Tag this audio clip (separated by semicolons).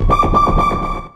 multisample; one-shot; synth